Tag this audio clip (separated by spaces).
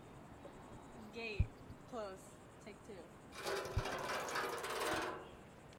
gate metal nails